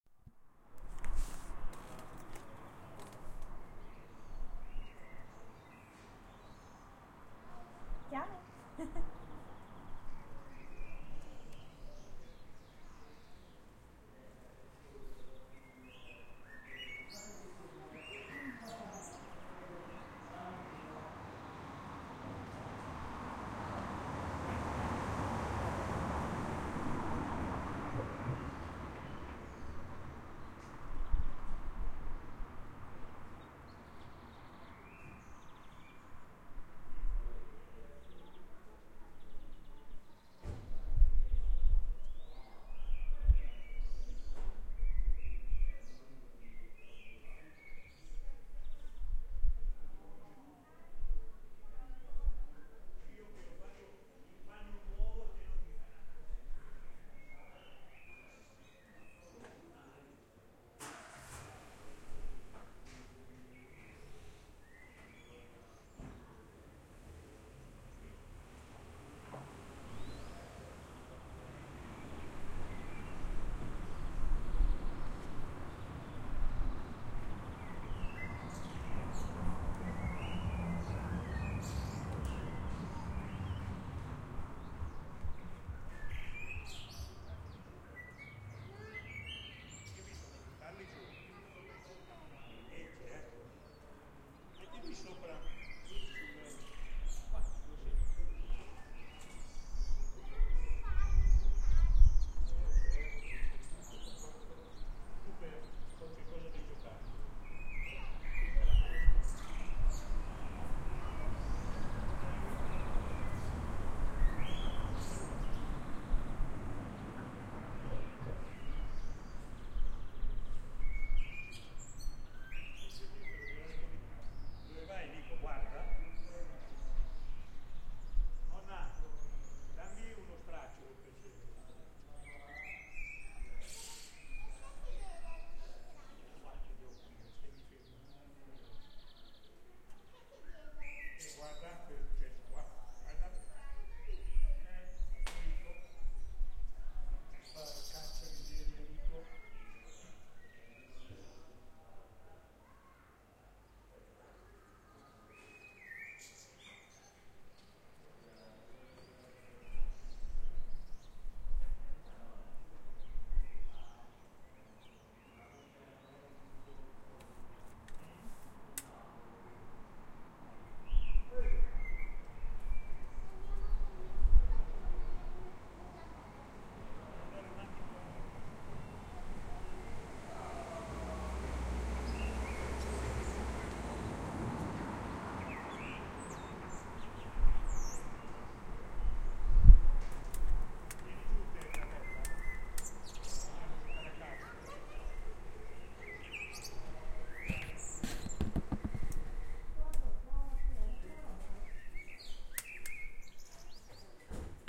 Small town Ambience
Ambience of a small town recorded from the balcony with a Yamaha Pocketrak cx. You can hear people chatting, cars moving, birds singing.
ambience, ambient, atmosphere, birds, cars, children, city, fi, field-recording, noise, people, soundscape, voices